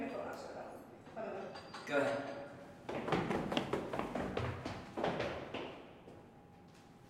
running up stairs
the sound of someone running up a flight of stairs with some white noise in the background.
footsteps, running, foley, stairs